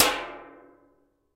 a metal tray is struck with a metal ruler. recorded with a condenser mic. cropped and normalized in ReZound. grouped into resonant (RES), less resonant (lesRES), and least resonant (leaRES).
metal, percussion